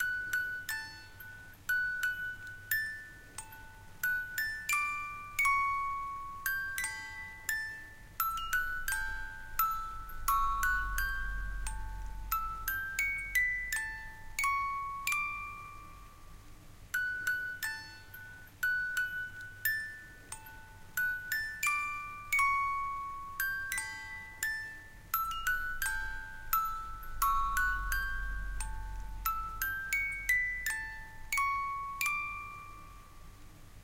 A stereo recording of an old music box playing Brahms' Lullaby. The music box hung above my crib in the late 80s!